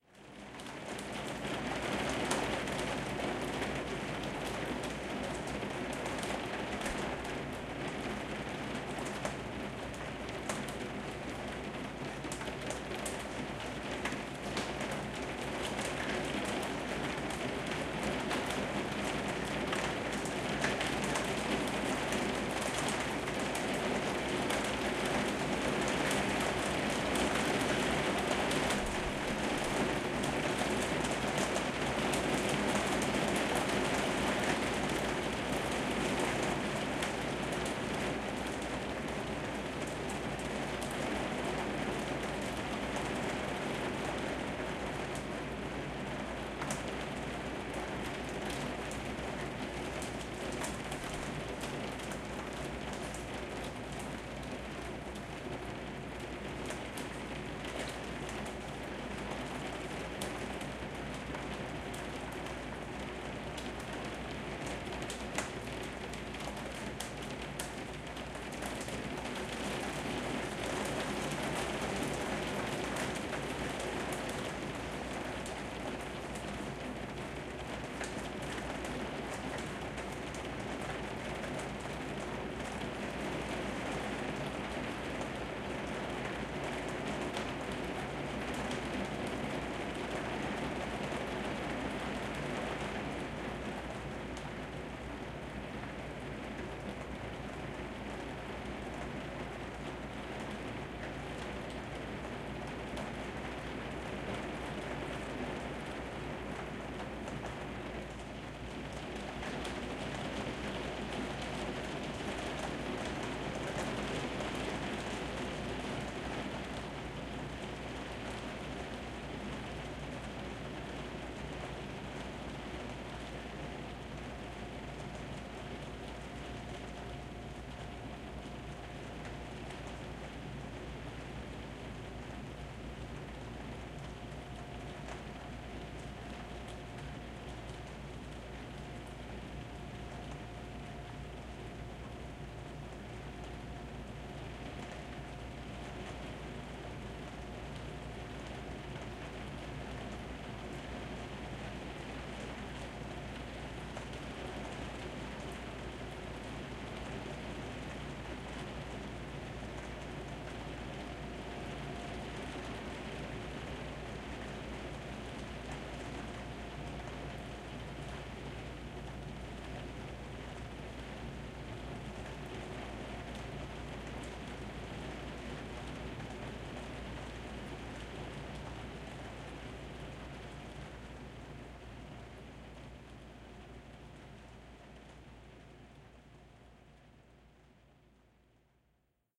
Night Rain on an Indoor Skylight
An evening rain recorded from living room indoors on a Zoom H4N - cleaned up in Audacity. Enjoy!